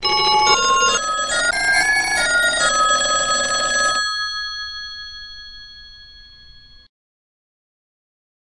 How I think a film wizard's phone would sound, were it to ring when some unscrupulous Hollywod producer called him to ask for a film.
phone, wizards